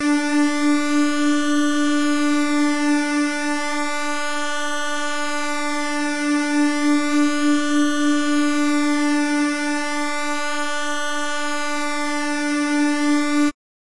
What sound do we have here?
Cool Square D4
D4 (Created in AudioSauna)
analog, synth